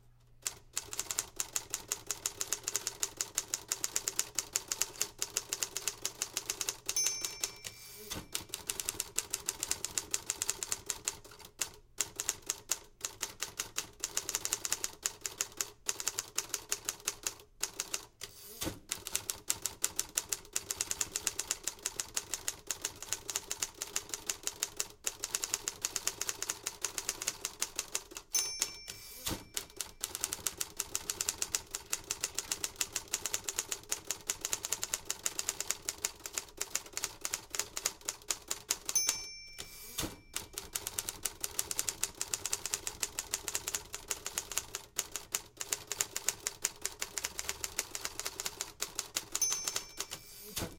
This is a recording of an old manual typewriter at work. I made this recording for a play that needed this sound since most of what I find on the internet was too modern.
typewriter, manual, old